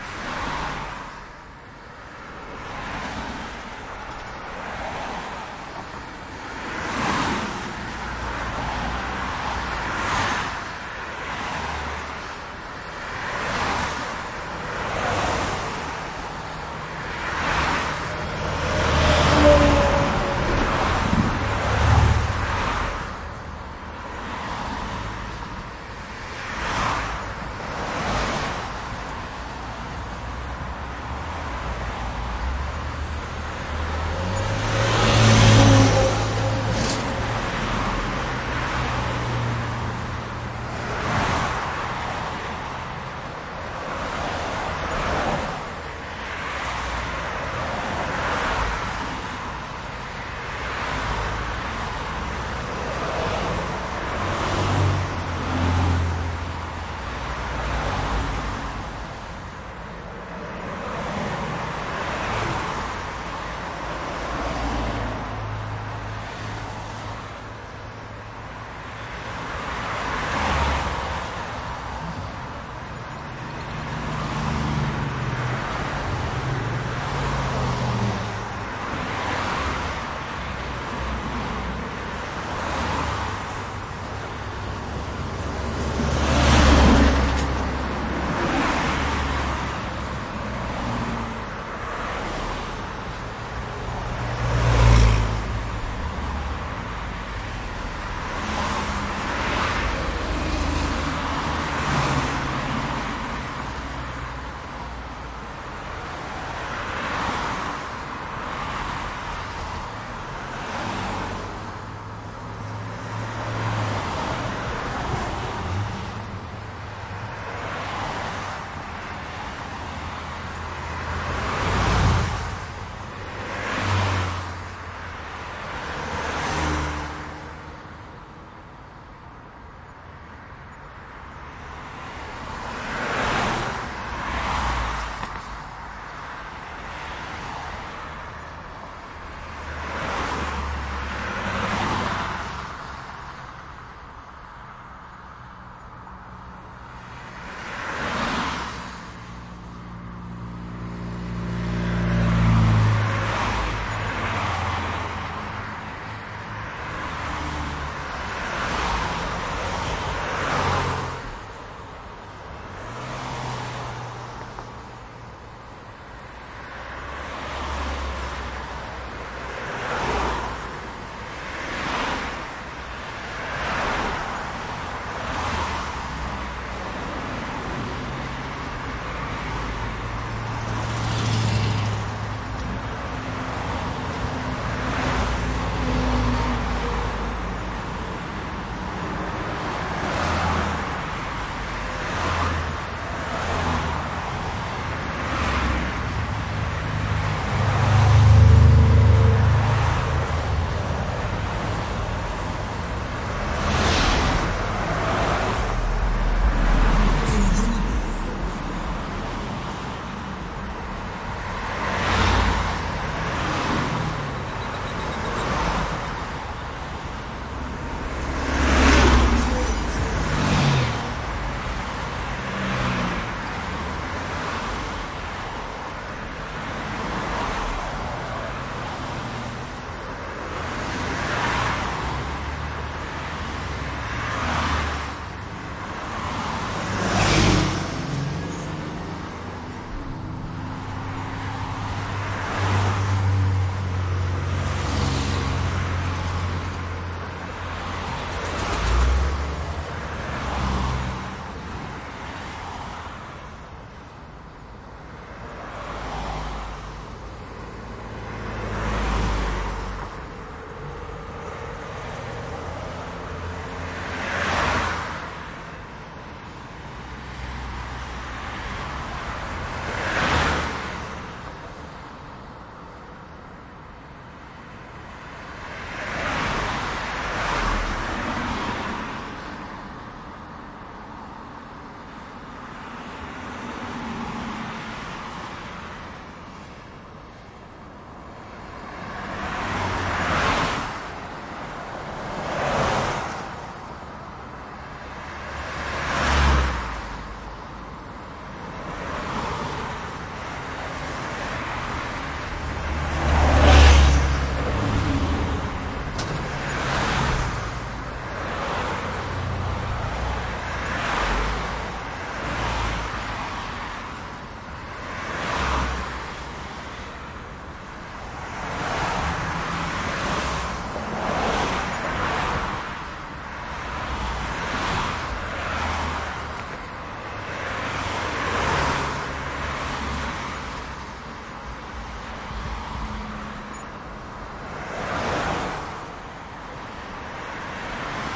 traffic highway medium speed vehicle pass bys from inside school bus with windows down1 close
bys, highway, medium, pass, speed, traffic, vehicle